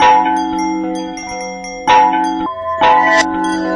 Musical wind chimes loop.